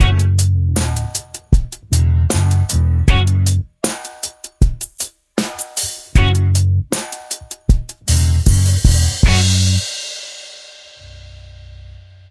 13 main mix cD
Modern Roots Reggae 13 078 Gbmin Samples